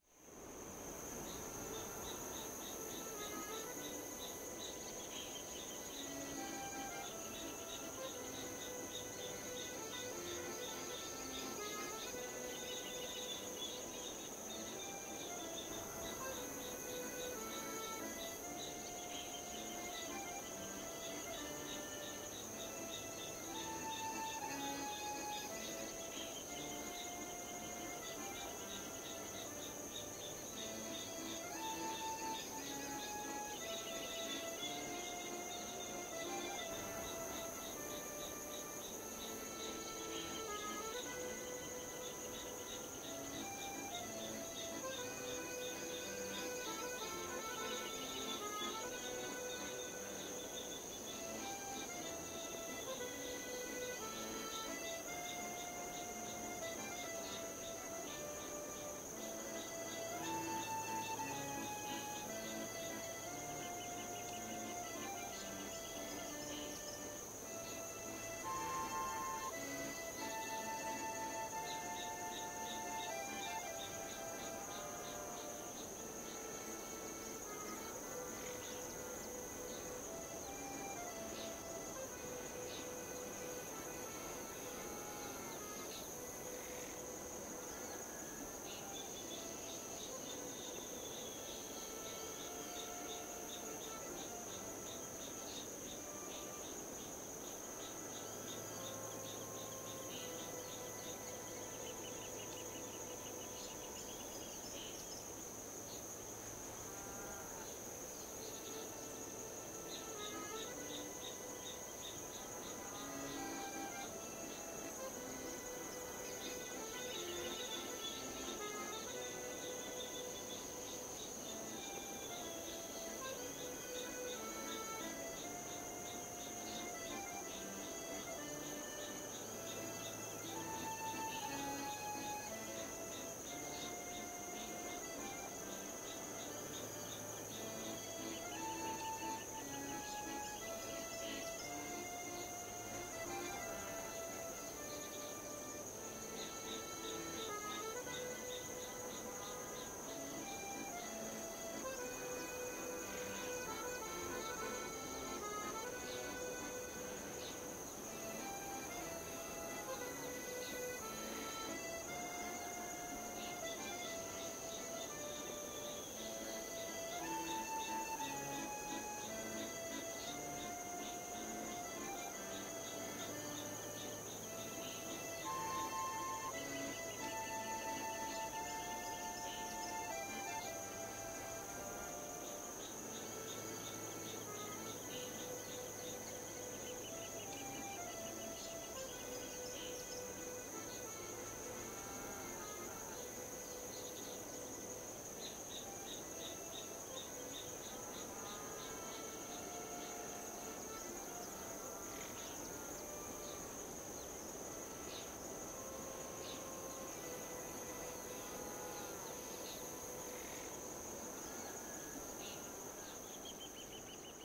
parisian streets
Very pleasant. It's awesome.
ambience, paris, accordion